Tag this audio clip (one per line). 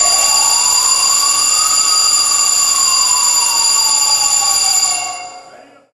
ring
alarm